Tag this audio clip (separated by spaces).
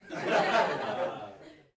live adults chuckle laughing audience theatre haha funny